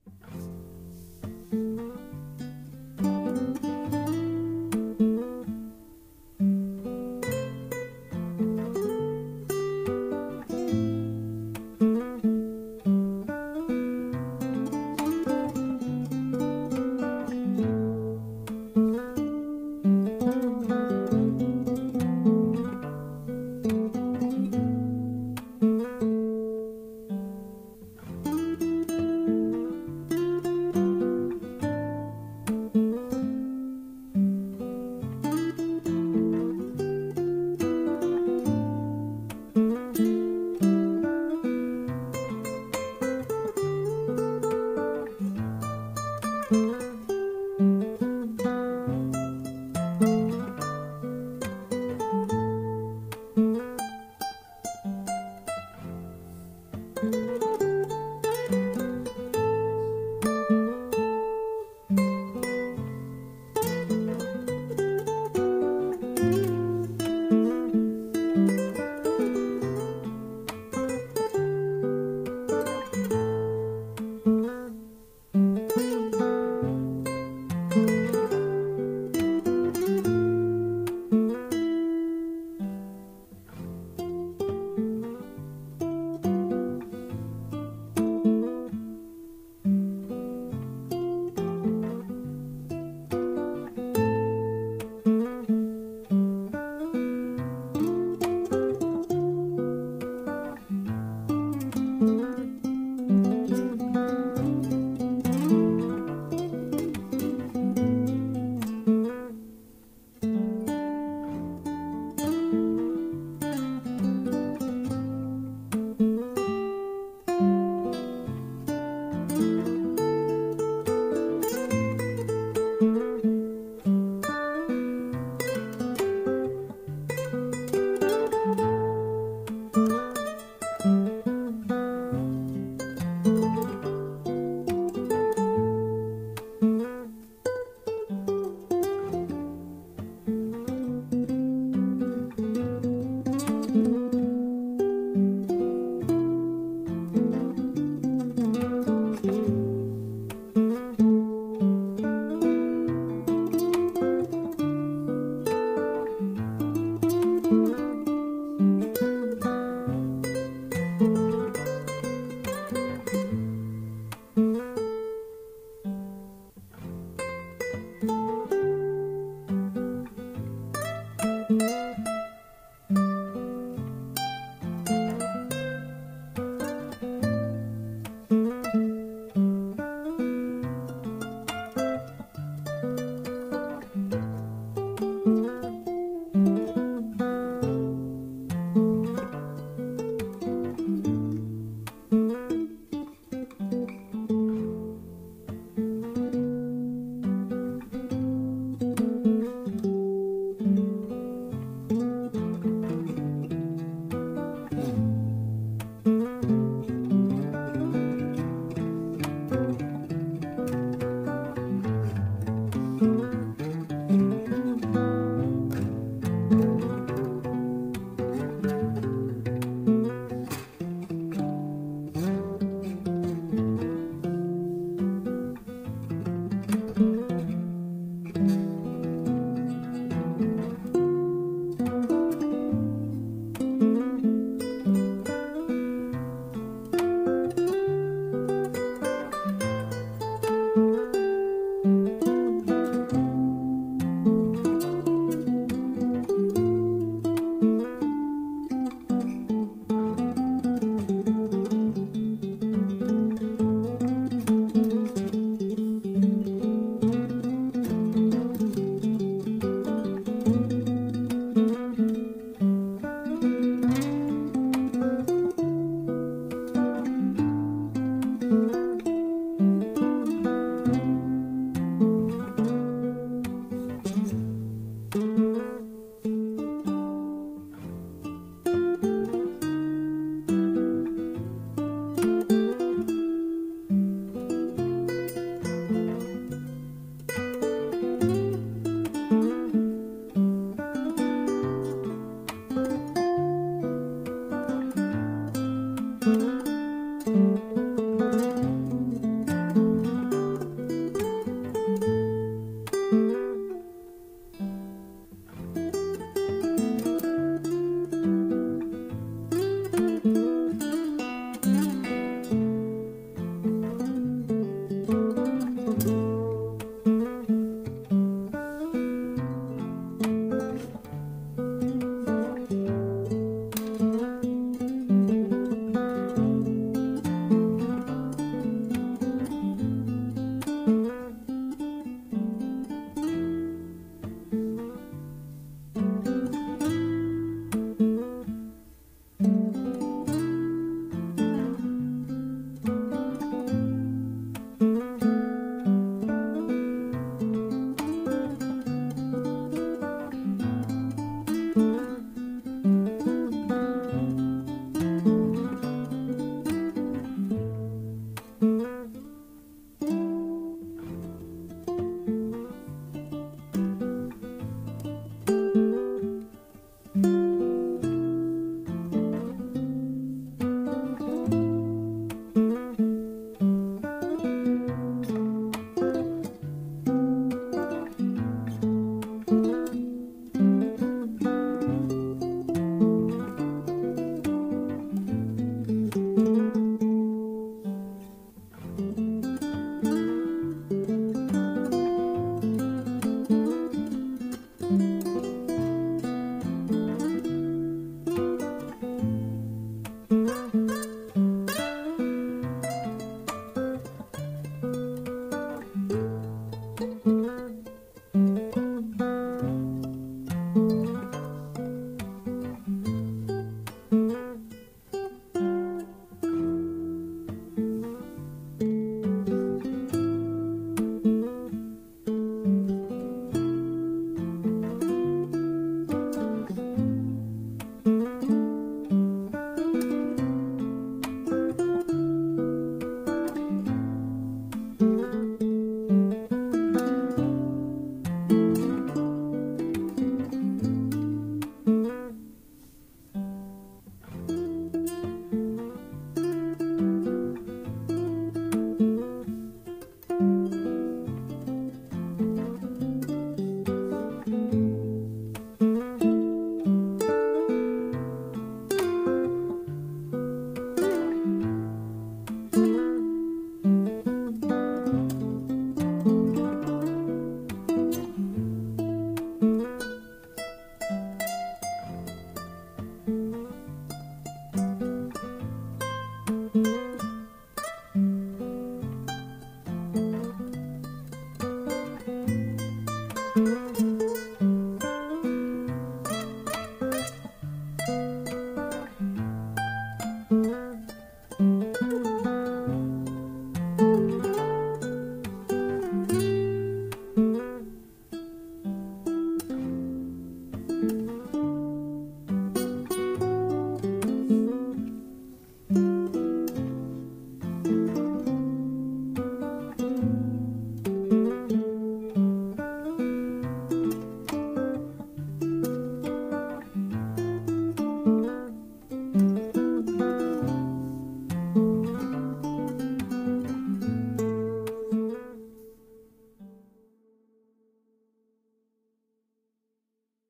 melancholy melody 11-9-21
It's a melancholy melody.
F G Am with improvisation on top.